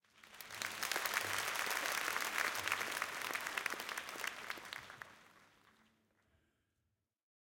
crowd applause theatre